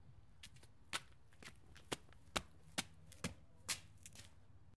Footsteps Sandals Going Up Concrete Steps
Running up exterior concrete steps with sandals on.
footsteps, concrete, foley, sandals, stairs, running, exterior, up